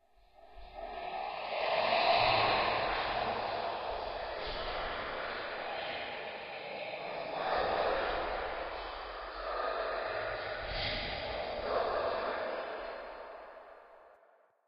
Ghostly voices, somewhat intelligible.
voices, scary, creepy, unearthly